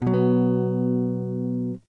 Jackson Dominion guitar run through a POD XT Live Mid- Pick-up. Random chord strum. Clean channel/ Bypass Effects.